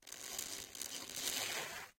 Queneau Frot 05
prise de son de regle qui frotte
clang, cycle, frottement, metal, metallic, piezo, rattle, steel